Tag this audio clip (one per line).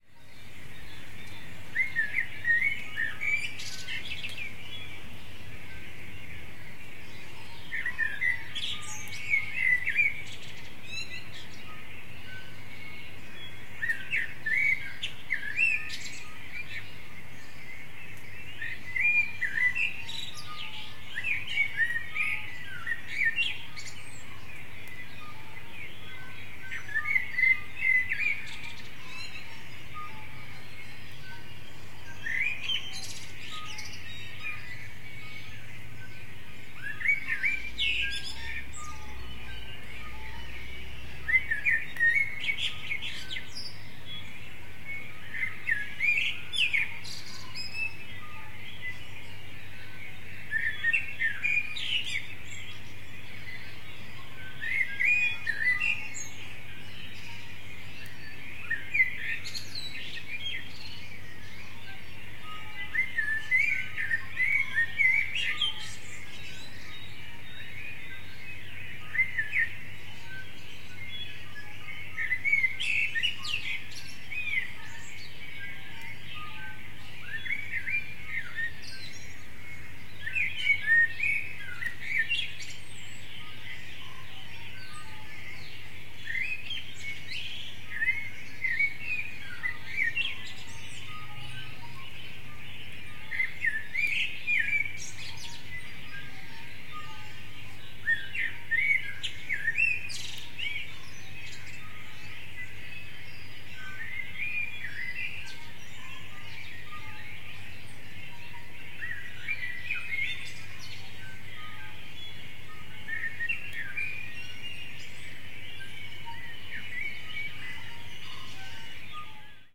bird
birds
birdsong
morning
spring